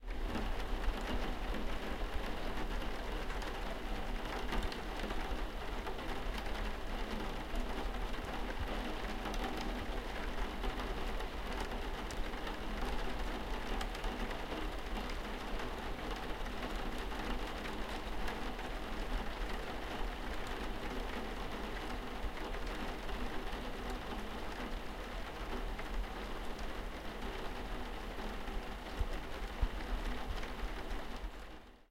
Rain on Windows, Interior, B
Raw audio of rain hitting a skylight window from inside the house. This recording was taken closer to the window than in the 'A' version (though the difference is negligible).
An example of how you might credit is by putting this in the description/credits:
The sound was recorded using a "H1 Zoom recorder" on 11th May 2016.
On, house, rain